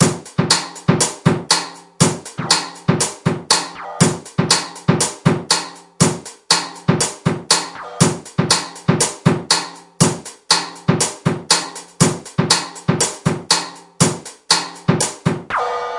metallic open snare, room ambiance drum kit tough kick with limiter, extreme pitch bend on cymbal. programmed using Linplug RMIV 2004
breaks, drum-loops, loops, 120, drums, breakbeats, bpm